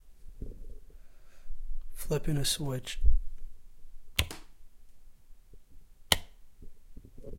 flipping a switch in my room. Recorded with a condenser Mic.